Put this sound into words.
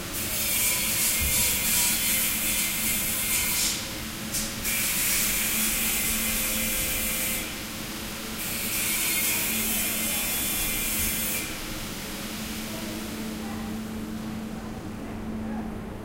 metro under construction
recorded at sant antoni metro station in barcelona with an edirol at 16 bits.